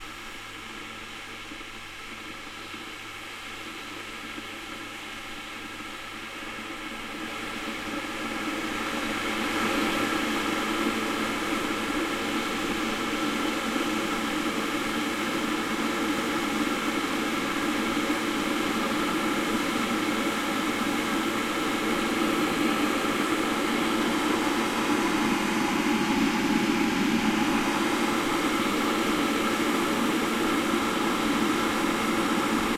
konvice vaření
Vaření vody v konvici.
Kitchen, boiling, boiling-water, kettle